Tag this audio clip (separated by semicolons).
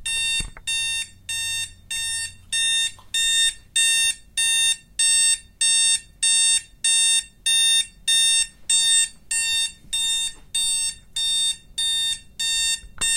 ambiance; clock; field-recording